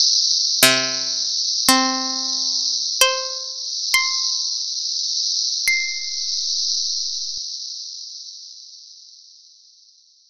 PELLERIN Antoine 2017 2018 guitar
The goal of this sound was to create an outdoor atmosphere with someone trying an instrument with sounds that was generate from audacity. To recall the wind or insects I choose risset drums with a frequency of 2000 Hz, a width of noise band of 1000 Hz and a center frequency of 5000 Hz with a loop and a fade in / fade out. For the instrument I generate pluck with MIDI pitch at 48, 60, 72, 84, and 96 which are the MIDI values for C notes.
Typologie de Schaeffer :
Note de guitare : N’
Risset Drum : X
Analyse morphologique :
Il s’agit d’un groupe de son cannelés car il y a à la fois des sons toniques et des sons complexes. Pas de vibrato présent. L’attaque du son est violente, la fin est plus douce.
guitar, outdoor, wind